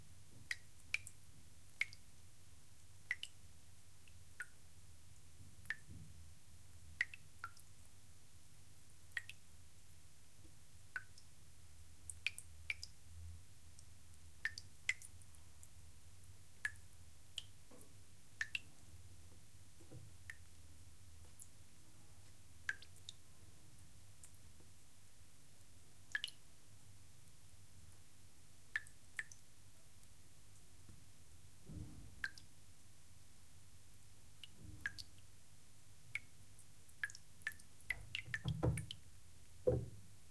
sound of water dripping from 20 cm. high in a slow rythm...